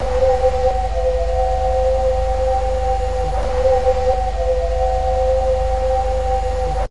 CR - Wind create chord
Good day.
Recorded with webcam - bottle sound + pitch shift, reverb.
Support project using